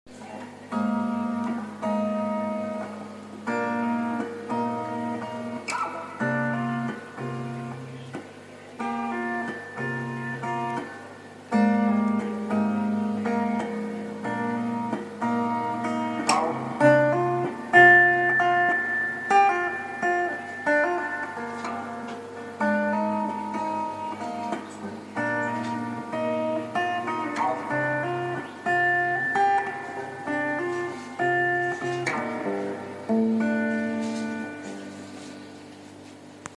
String in the make 3
Uneek guitar experiments created by Andrew Thackray
Guitar, Instrumental, strings